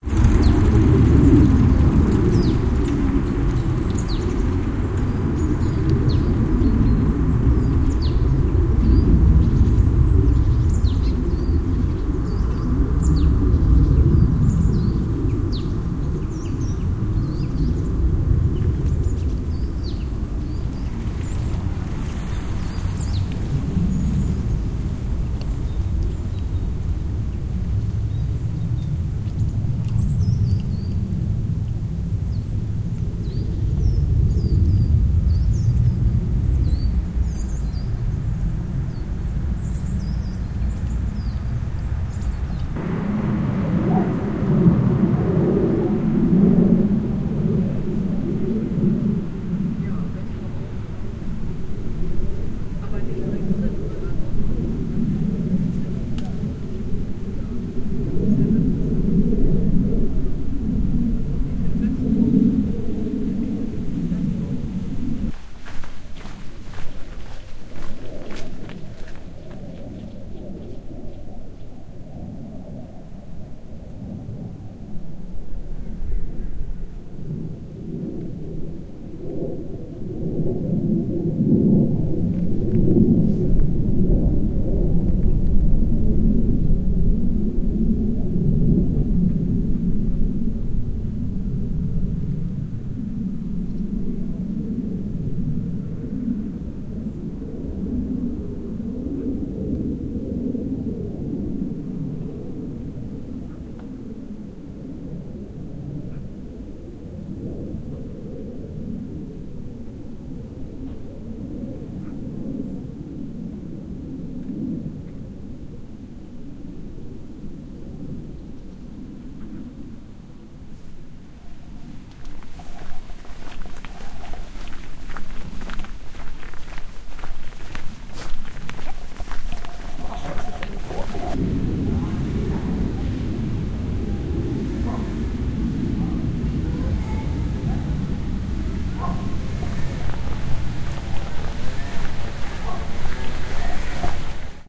18.8.2015, 14.14 bis 14.30 Uhr: Militärischer Fluglärm in der Flugzone ED-R 401 über Region Rheinsberg / Kagar / Wallitz / Zechlin 150818 1414bis1430
Die touristisch attraktive Region Rheinsberg liegt seit Herbst 2013 unter der neu und heimlich eingerichteten militärischen Sonderflugzone ED-R 401 MVPA North East.
Hier zu hören: Ausschnitt aus einem Spaziergang über ein Feld am Rande von 16837 Kagar am 18.8.2015 zwischen 14.14 und 14.30 Uhr. Zu hören ist, wie einnehmend, vorder- und hintergründig der militärische Flugbetrieb diese bis Herbst 2013 völlig stille Landschaft verseucht.
This sound snippet: A walk over a field in the popular holiday region of Rheinsberg / Mecklenburg Lakes region in the north east of Germany, famous for its natural beauty and - until autumn 2013 - for its rare silence and tranquility. This snippet is of Aug 18th, 2:14 to 2:30pm, ongoing. You will hear Eurofighter / Typhoon Jets poisoning the landscape with military aircraft noise. High altitude flight patterns blanket the area with thorough and omnipresent noise carpets.